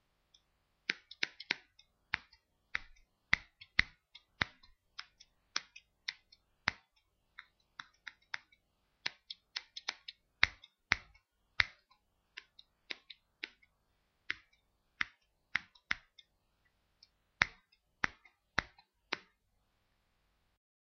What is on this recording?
Needed the sound of sissors cutting hair, so I cut som air. I´t almost the same.